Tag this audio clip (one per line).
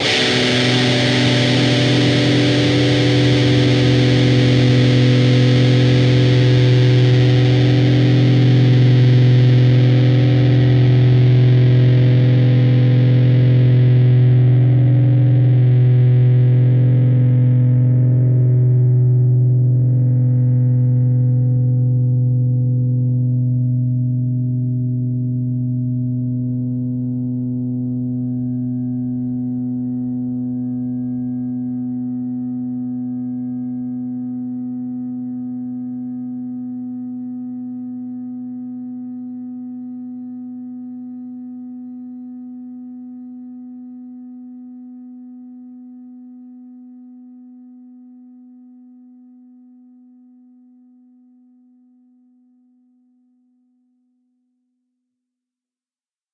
chords
distorted
distorted-guitar
distortion
guitar
guitar-chords
rhythm
rhythm-guitar